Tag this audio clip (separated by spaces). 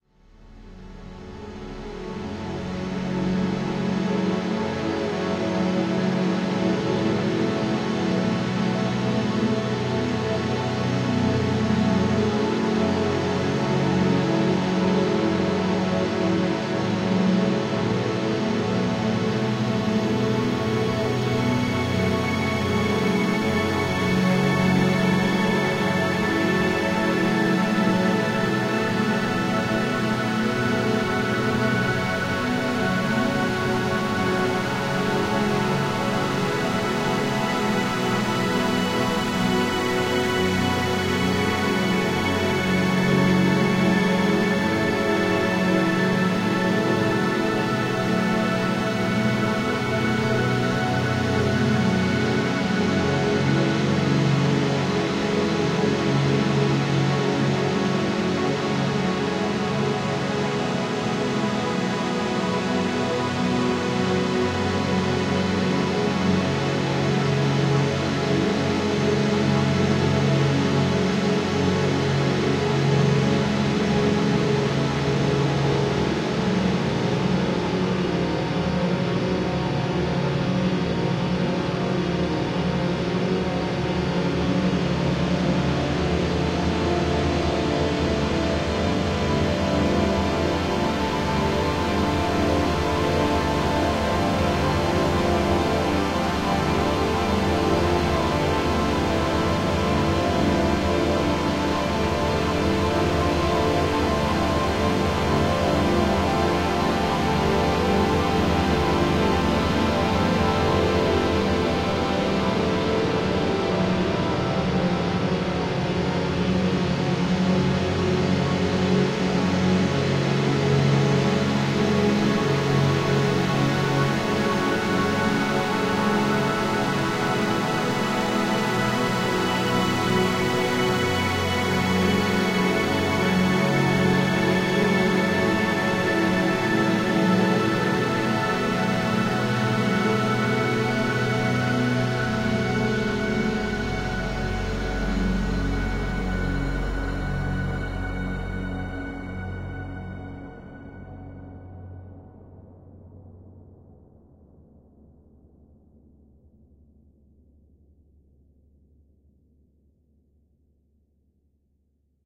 emotion floating fl-studio free synthetic-atmospheres